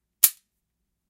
Gun trigger pull 4
Pulling the trigger on a revolver (dry fire). recorded with a Roland R-05
revolver, gun, trigger, dry, fire, pull